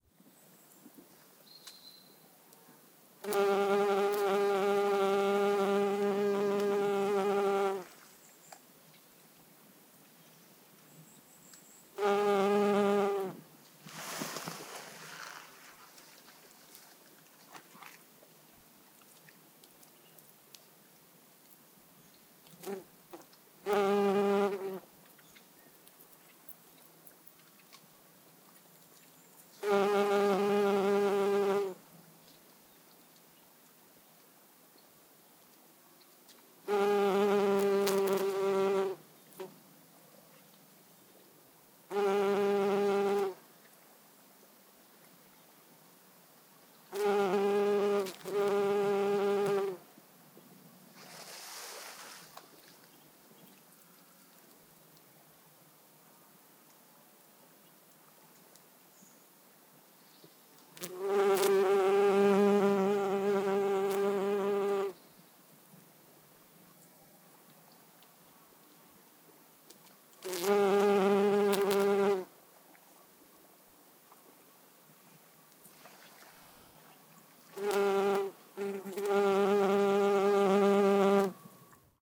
Recorded in Bielowieza Forest (Poland) with MKH50